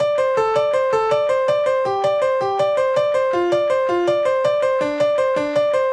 Backing piano used in the song Anthem 2007 by my band WaveSounds.